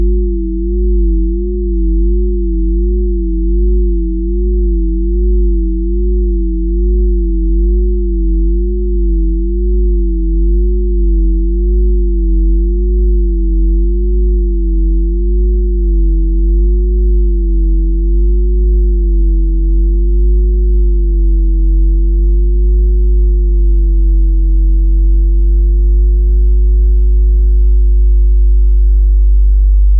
Long stereo sine wave intended as a bell pad created with Cool Edit. File name indicates pitch/octave.
pad, synth